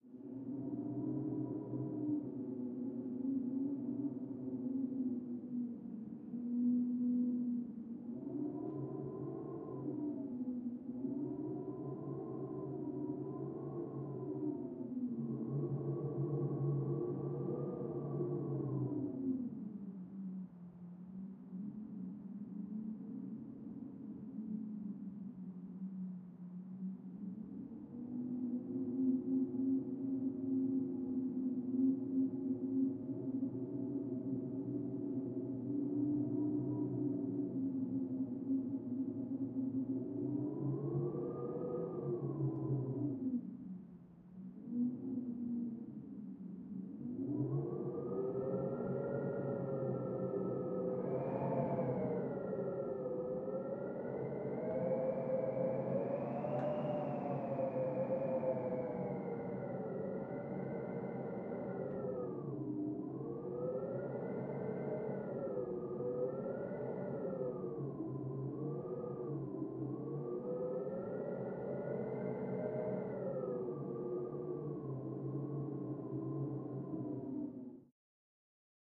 Caught (after quite a few attempts) sound of strong wind howling - courtesy of peculiar layout of our new apartment. Did a lot of EQ'ing, though: my Tascam recorder got noise lately.